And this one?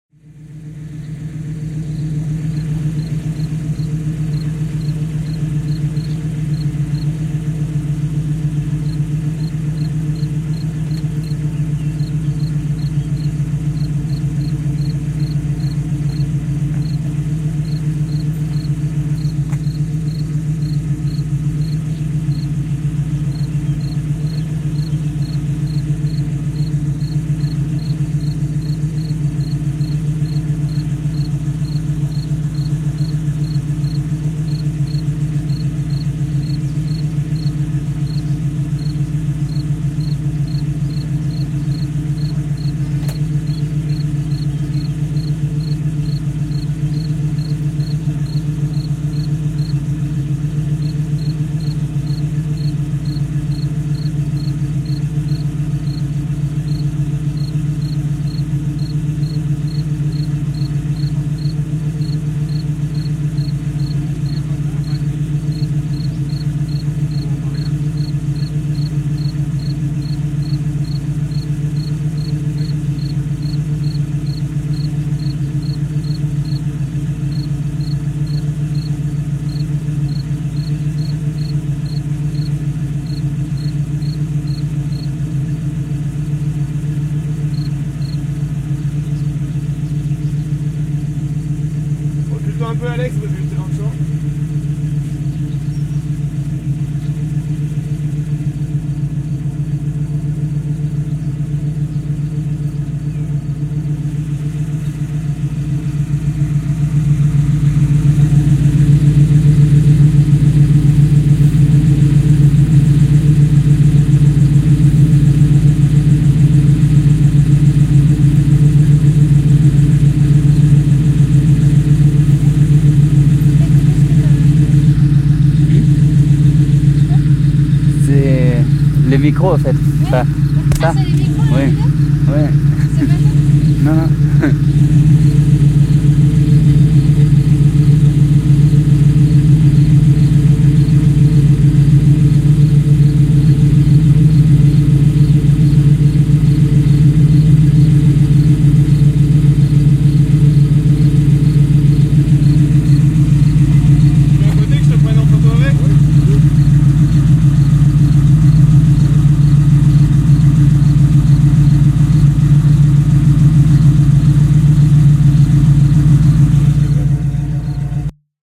MUSTANG - EXT AMBIENCE MOTOR CONSTANT
Sportscar Mustang, Recorded on little village Street in the north of France
Mustang, Sports-car, Switch, Car, FX, Motor